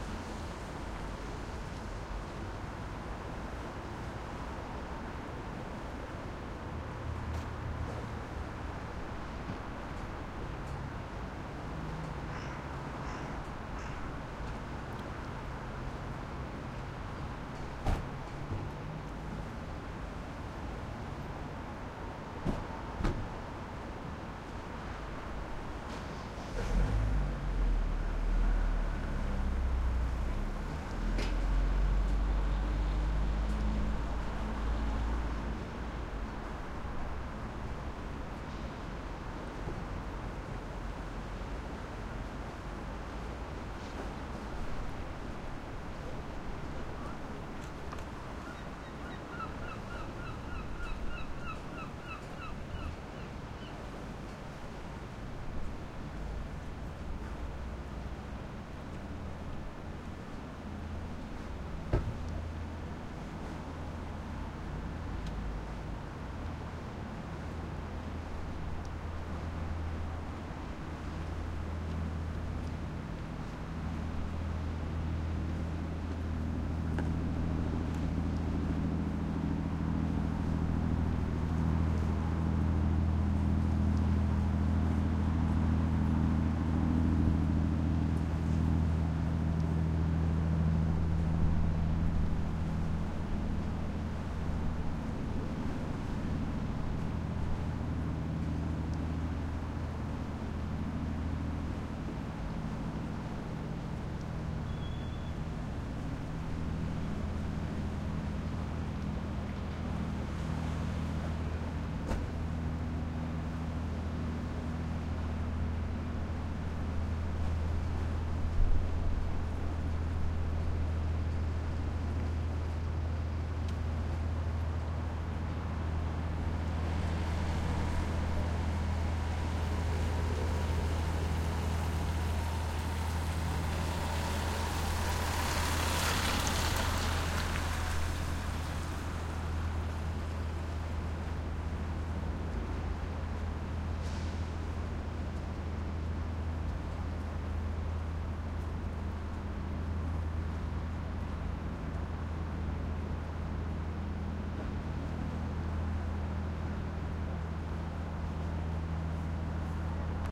Short recording done in Oban North Pier on a windy evening in November 2010 using a Sony PCM-D50 recorder. Not many waves, a boat and some traffic.